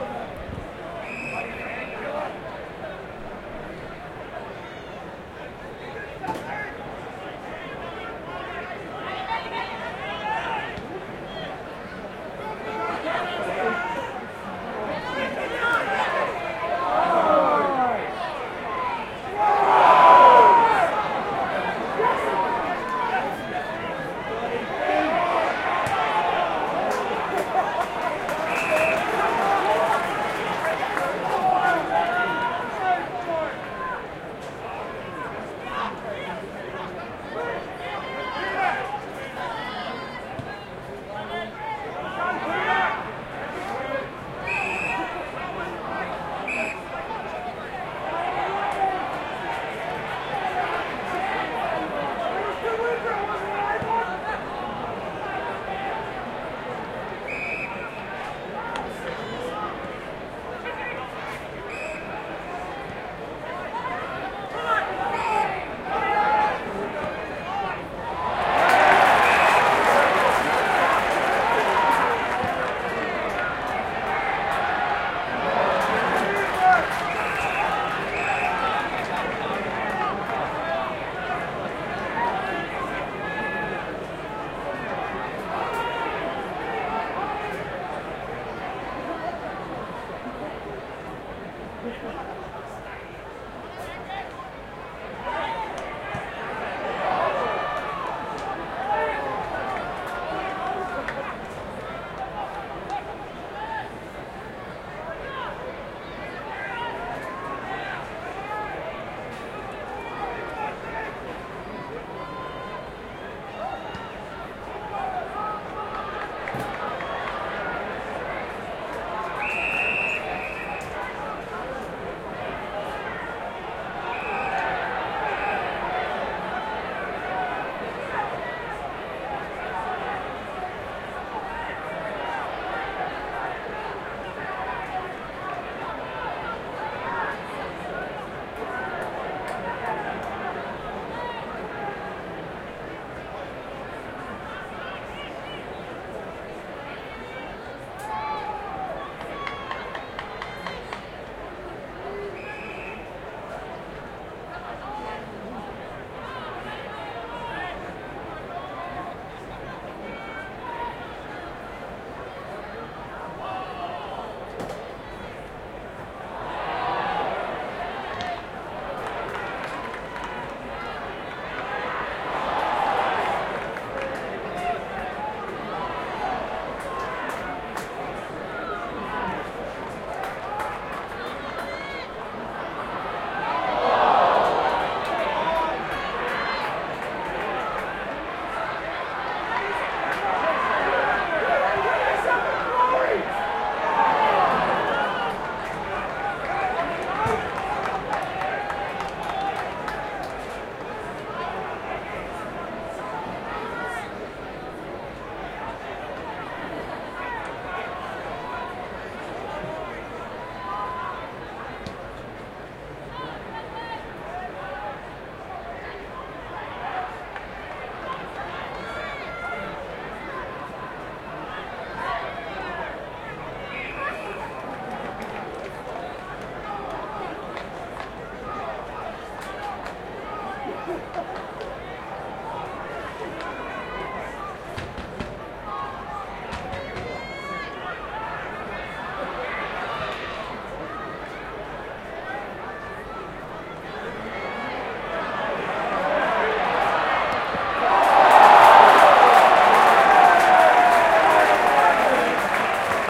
AFL Game
Recording of Sturt and Norwood supporters at a game at the Norwood oval.
Adelaide, Sturt, Australian